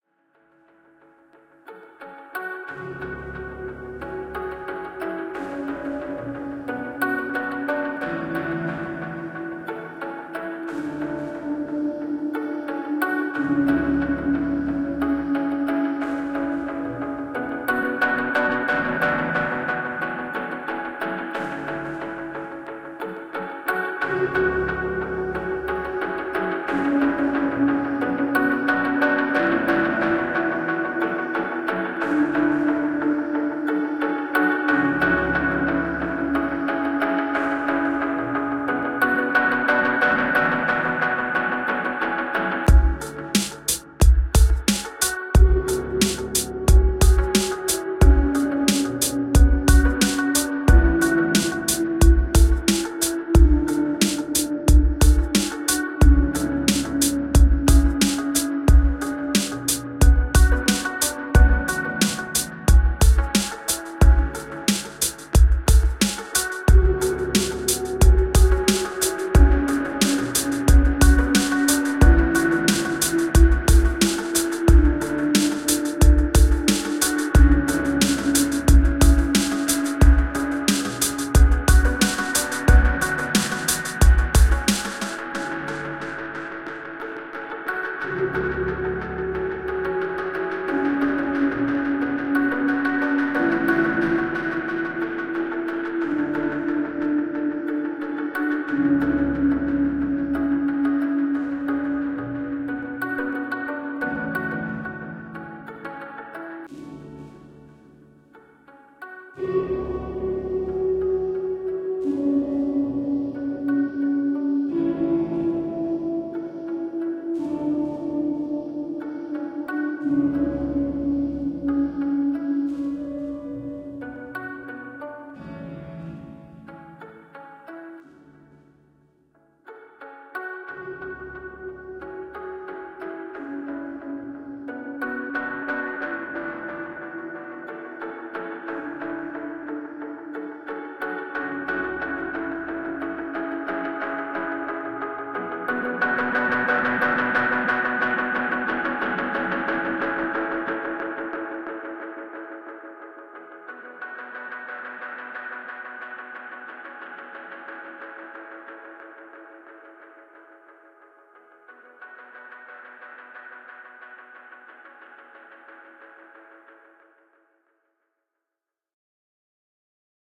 Some clicks & cracks here.. Kontakt 5 has been giving me some performance issues lately.
Guitar Passage (90 BPM_ E Minor) by SSS_Samples
License -
Over the city Piano theme by EKVelika
License -
License -
kick 2 psy by brudelarge
License -
Spyre Break 27 by eryps
License -
dance, stab, reverb, recording, delay, loop, synth, effect, atmosphere, trance, space, loopmusic, drums, music, ambient, electronic, pad, sound, piano, Mix